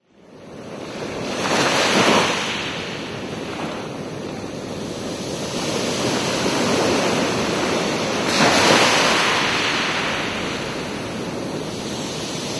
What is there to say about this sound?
sounds from the beach